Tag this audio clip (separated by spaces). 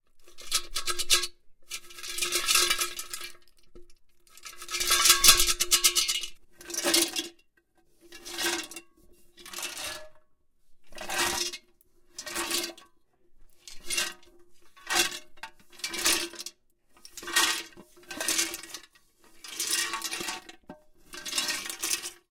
Rattle metallic shifting